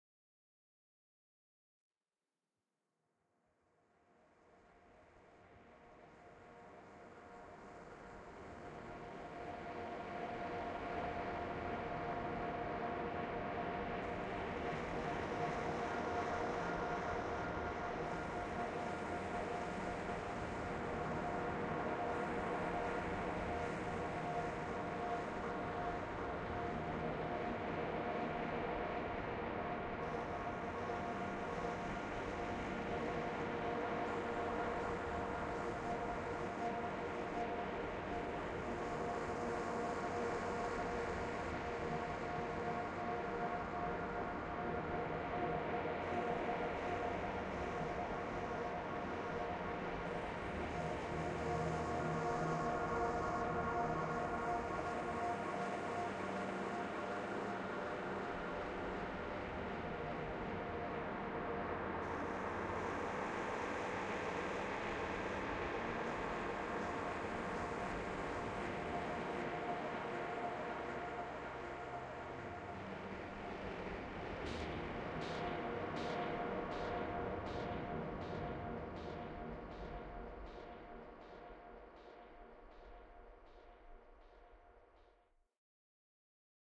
long and low
Fx_Soundscapes from manipulating samples(recording with my Zoom H2)
bass, lfe